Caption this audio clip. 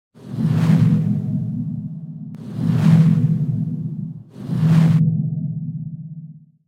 Bronze Dragon Fly
Sound for a bronze-feathers Dragon.